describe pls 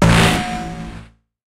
DrumPack002 Overblown Glitched Snare 02 (0.18 Velocity)
How were these noises made?
FL Studio 21
Track BPM: 160
Instruments: FPC
Drumset / Preset: Jayce Lewis Direct
Effects Channel:
• Effect 1: Gorgon
◦ Preset: Alumnium Octopus (Unchanged)
◦ Mix Level: 100%
• Effect 2: Kombinat_Dva
◦ Preset: Rage on the Kick (Unchanged)
◦ Mix Level: 43%
• Effect 3: Kombinat_Dva
◦ Preset: Loop Warmer (Unchanged)
◦ Mix Level: 85%
Master Channel:
• Effect 1: Maximus
◦ Preset: NY Compression (Unchanged)
◦ Mix Level: 100%
• Effect 2: Fruity Limiter
◦ Preset: Default (Unchanged)
◦ Mix Level: 100%
What is this?
A single 8th note hit of various drums and cymbals. I added a slew of effects to give a particular ringing tone that accompanies that blown-out speaker sound aesthetic that each sound has.
Additionally, I have recorded the notes at various velocities as well. These are indicated on the track name.
As always, I hope you enjoy this and I’d love to see anything that you may make with it.
Thank you,
Hew